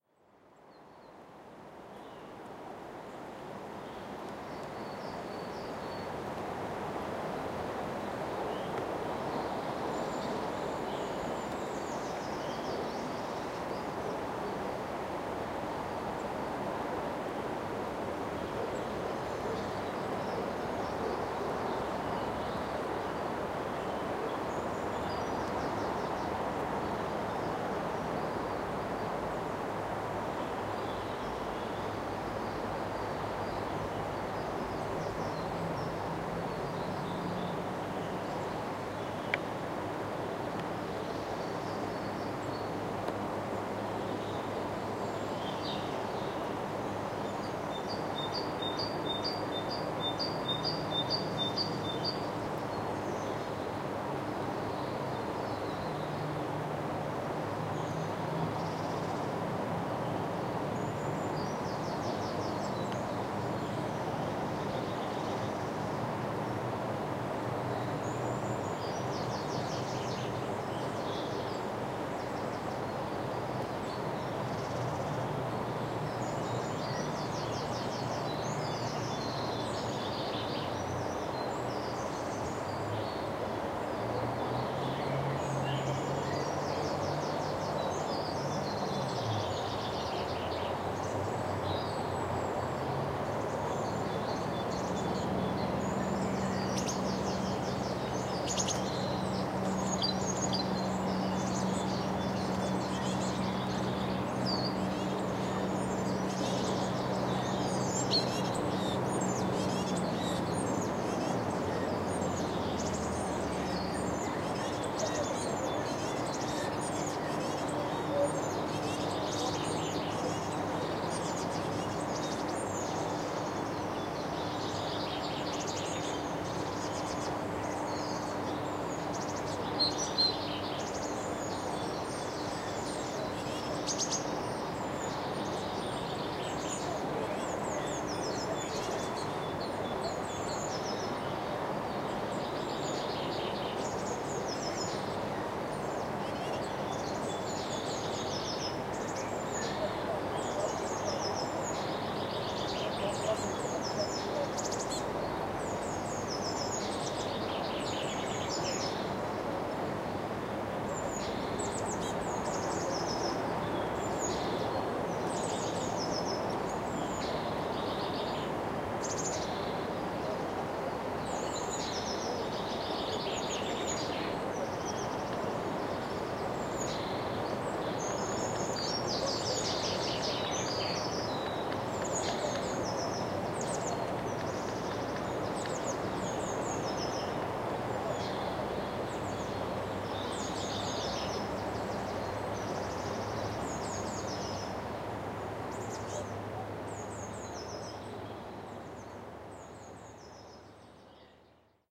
Ambient recording from Boleskine, south Loch Ness. It's the first sunny weekend early spring 2015 and the Loch Ness season begins. You can hear some birdsong, distant boat engine and walkers talking to each other.
Stereo recording made using Zoom H1 recorder and edited in audacity.